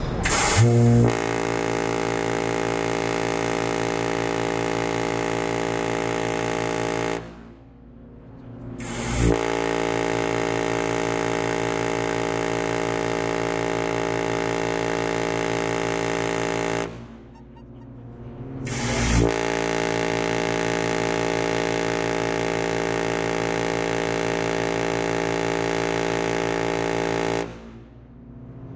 The ship-horn of the Queen-Mary-2 recorded on the deck while leaving New-York harbour.
Recorded with Canon G10.